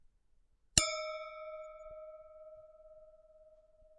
11-1 Wine glasses
Clicking of wine glasses